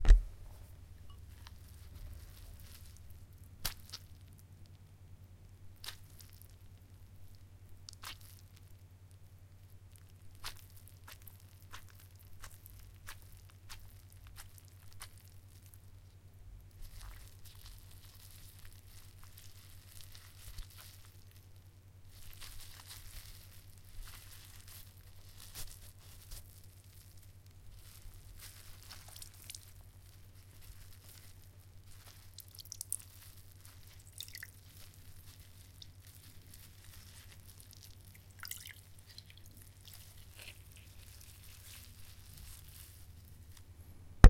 Flesh Blood Slashing Guts Killing
Blood, Cut, Flesh, Guts, Killer, Killing, Slash, Slashing